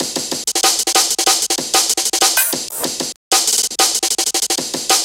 - REC 190bpm 2020-08-23 02.25.15
beats, amen, loops